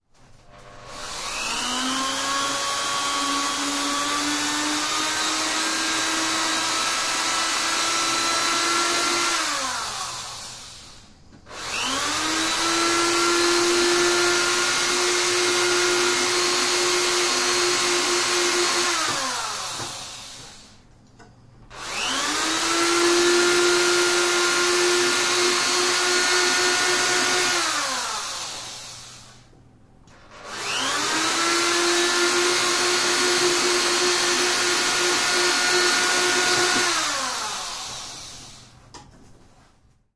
Power drill recorded in the workshop.
drill, workshop, diy, dr-100, power-drill, tascam